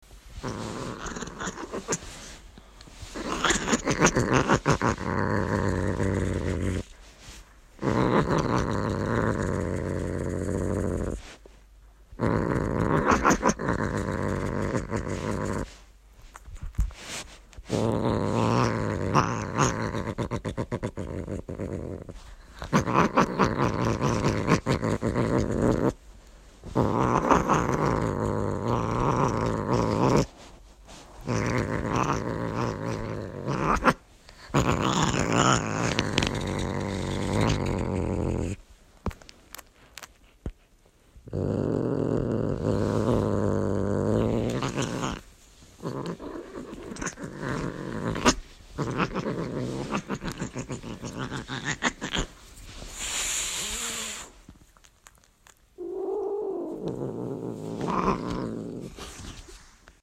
horny cat
A female cat in heat, acting crazy
be-at-in-on-heat, cat, cat-sound, crazy-cat, female-cat, female-cat-in-heat